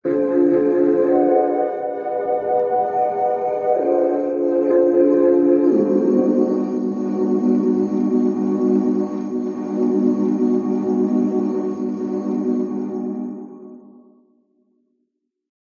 Something that an alien might listen to.